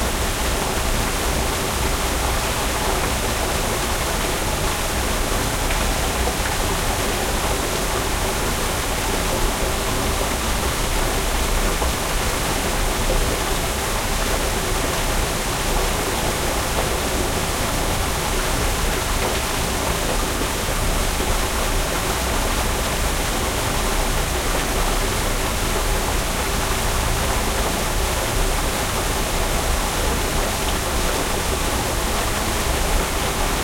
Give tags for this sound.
surge; water

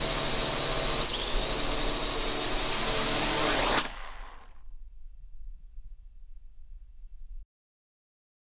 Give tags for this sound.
close; drone; engine; flying; h6; helicopter; landing; plane; propeller; quadrocopter; shotgun; swirl

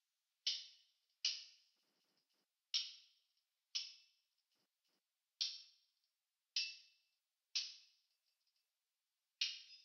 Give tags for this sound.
Ambient; Battle; Fantasy; Fight; Foley; Hit; Metallic; Pirate; Sword

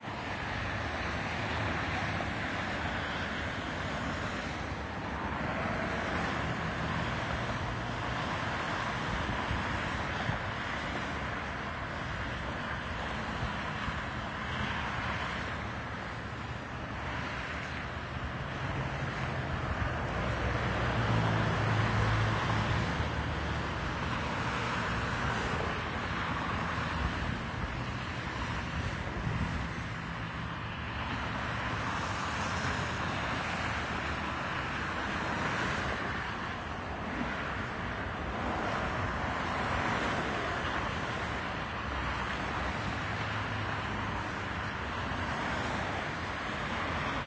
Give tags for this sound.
ambience; car; cars; city; driving; field-recording; highway; noise; road; street; traffic; urban

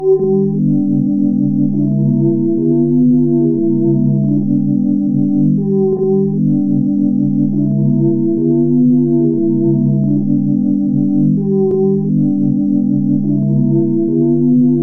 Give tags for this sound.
rythms; sinus

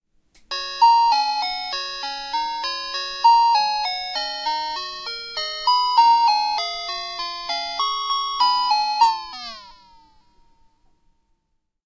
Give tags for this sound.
sad; xmas; christmas; cheap; funny; music; disappointing; failure; toy; joke; electronic

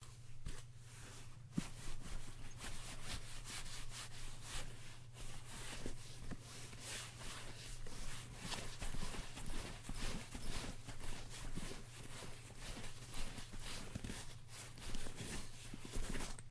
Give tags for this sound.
bag; objects; rummage; stuff

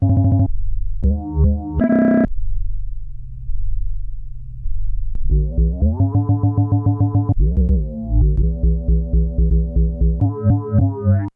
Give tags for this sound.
glitch; rythm; background; idm; melody; nord; ambient; electro; soundscape; backdrop

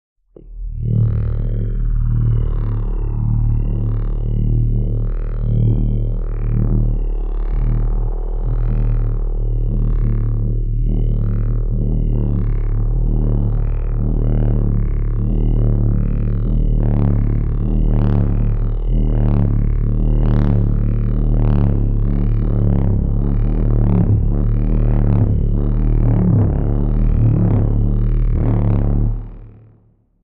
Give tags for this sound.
synth; alien; field; soundesign; science-fiction; mutant; magnet; suspence; force; space; sci-fi; futuristic; drone; effect; dark

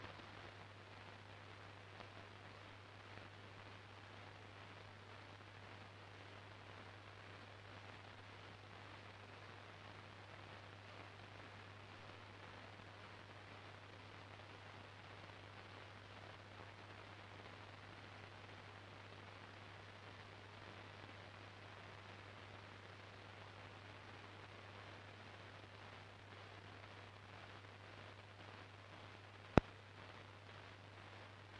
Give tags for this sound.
radio-static; tuning; noise

